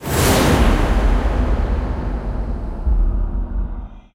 Apocaliptic sound maybe for mixing with others like doors crash hits stucks
big, enormous, sound